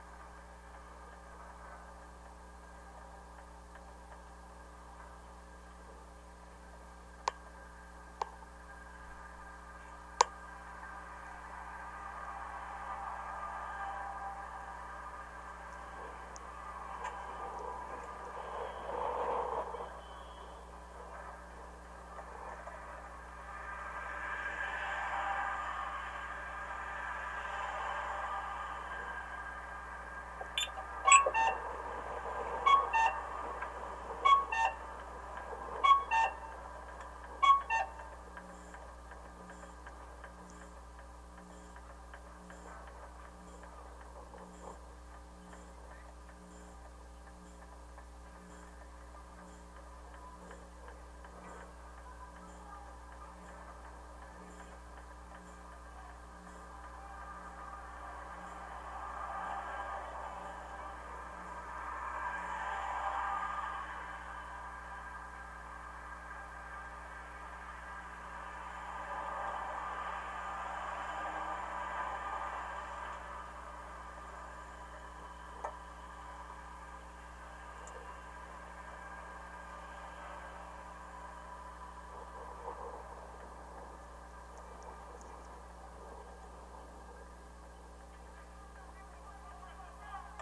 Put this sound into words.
Contact mic recording of the traffic signal post at Lafayette Street at Calle de Primavera in Santa Clara, California. Recorded July 29, 2012 using a Sony PCM-D50 recorder with a wired Schertler DYN-E-SET contact mic. Traffic noise, resonance, planes overhead, crossing-signal klaxon.